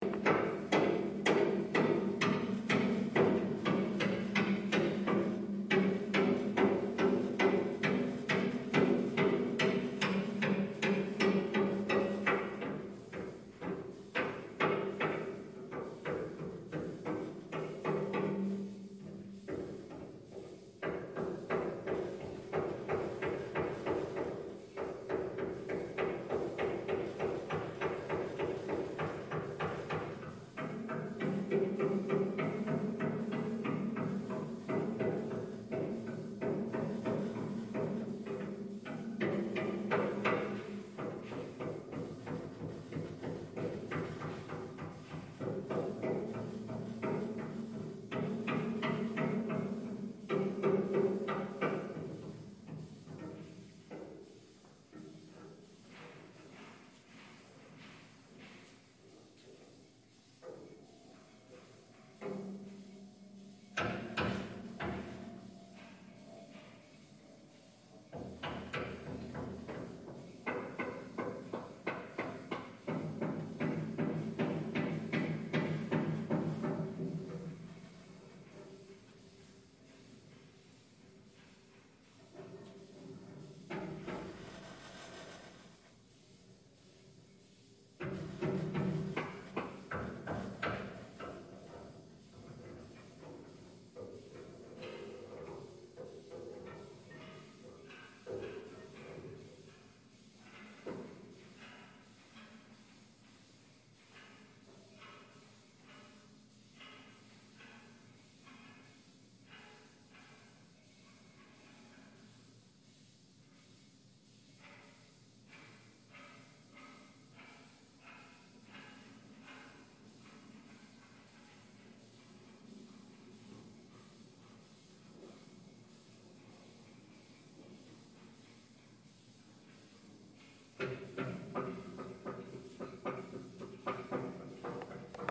Fire Escape Banging
The sound is recorded in Washington Heights, New York, at a former apartment I was living in 2011. Construction workers performing maintenance work on fire escapes and the sound reflect in the center plaza of the apartment building.